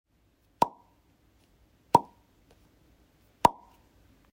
Pop sound
A short popping sound made with my finger in a plastic tube
plop, pop, popping, short